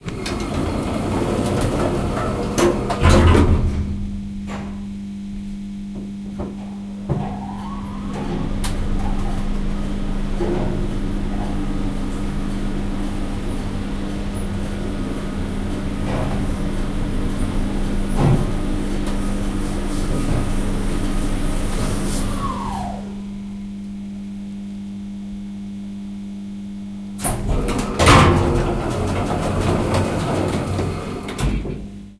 A simple elevator ride between 4 floors: Doors closing, cabin moving, stop, doors opening. Recorded with a Zoom H2N in X/Y stereo mode.